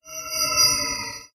Sounds like a teleportation device powering down maybe?